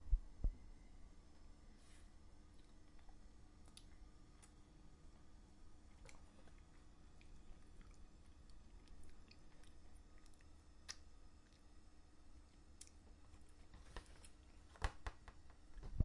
Tasting chocolate
tasting, eat, chocolate